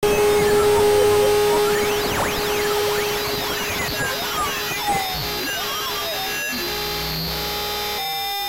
Radio Glitch SFX
8-Bit, broken, C64, distort, error, Glitch, Lo-Fi, machine, program, radio, robot